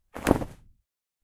Jacket/Cloth Rustle 1
One of many recordings of me waving my jacket around in a soundproofed room.
air, cape, cloth, clothes, coat, feathers, fly, jacket, jump, rustle, whoosh, wind, woosh